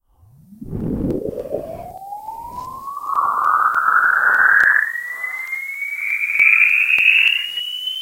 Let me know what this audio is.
Another tone-generated sound was the basis for this
sound. I used harmonics, equalization for waveform
change to segments of the wave. I used fade-in to
give the wave a funnel appearance on the snapshot,
Finally I shortened the wave with the tempo change
and adjusted the amplification.